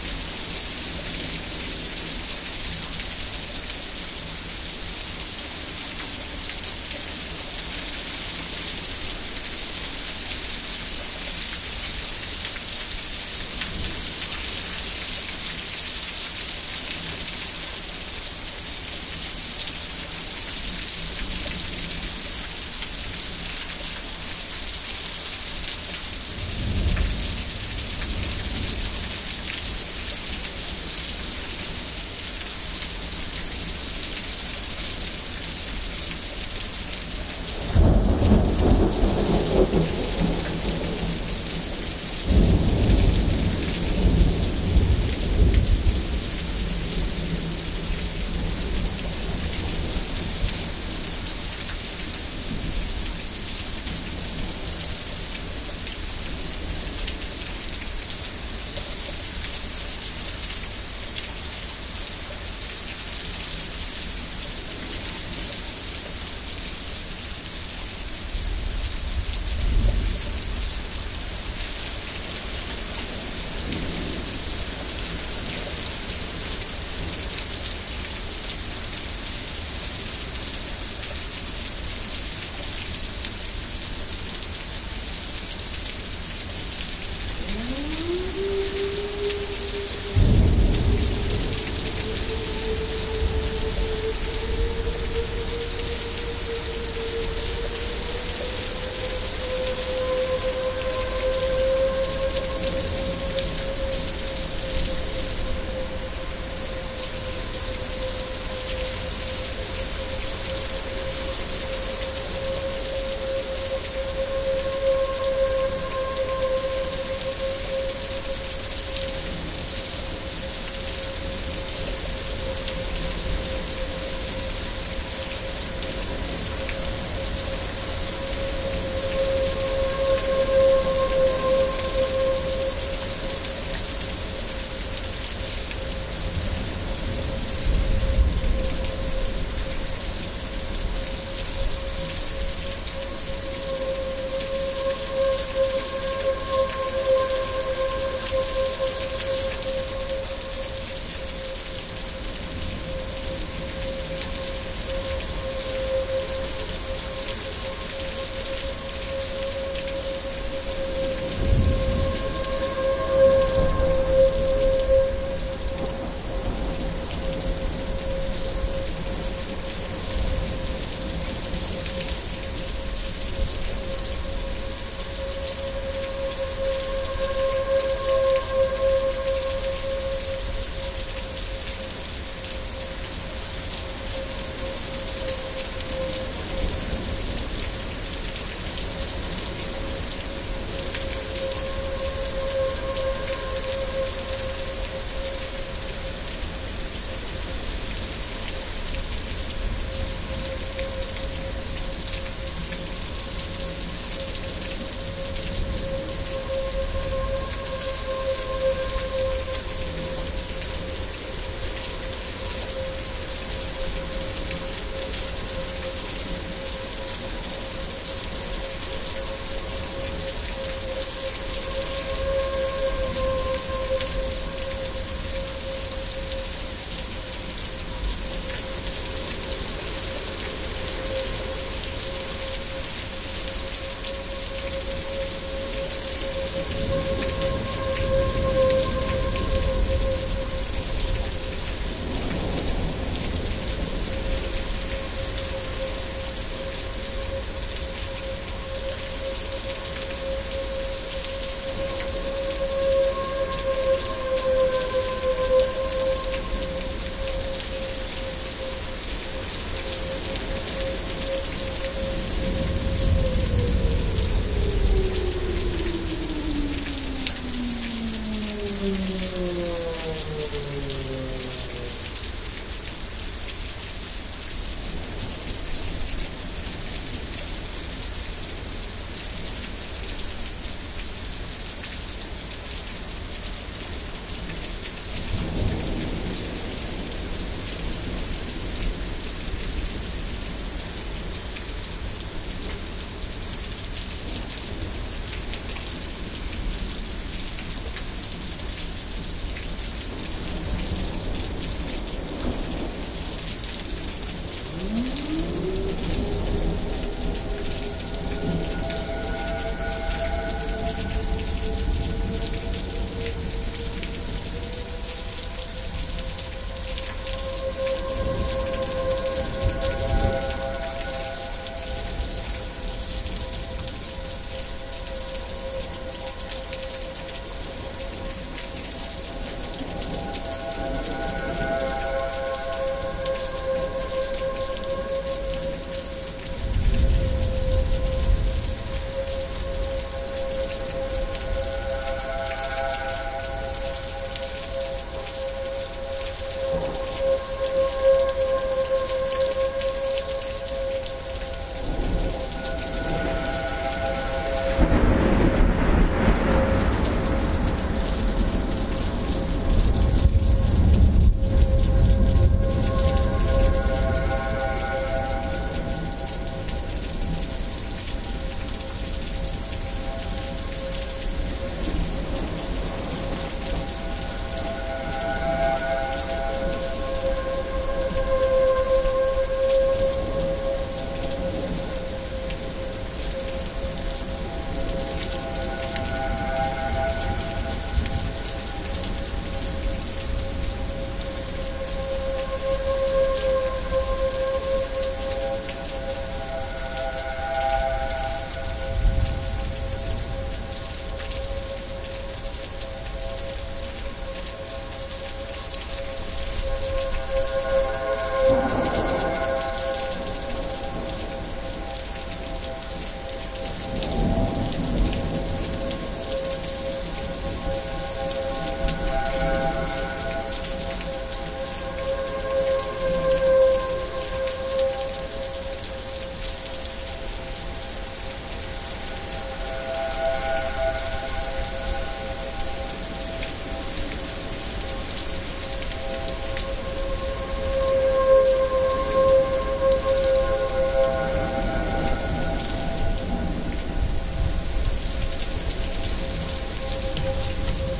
Summer Storm, Wind, Thunder, Sirens
A heavy storm in a small town. Tornado sirens are going off, a stiff wind rips through the trees as rain pelts down. Cracking peals of thunder.
siren, thunder, storm, wind, thunderstorm, rain, sirens, hurricane, weather, lightning, emergency, tornado